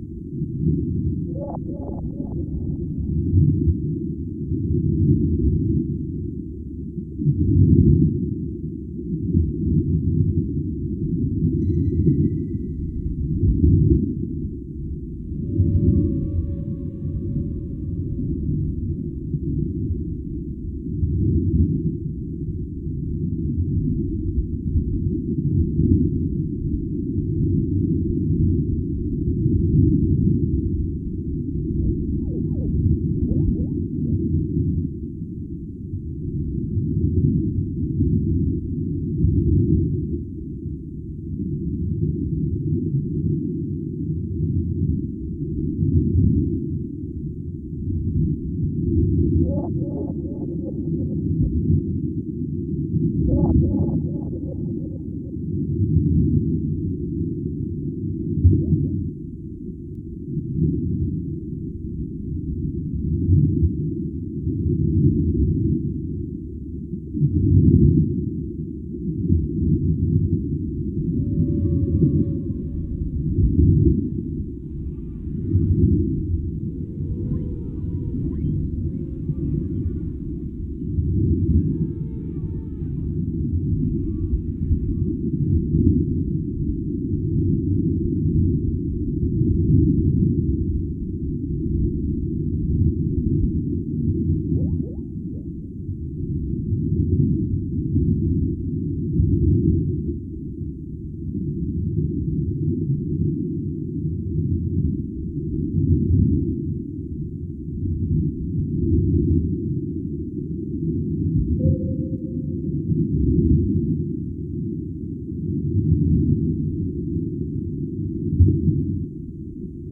wendywater2minheel
and sound editing.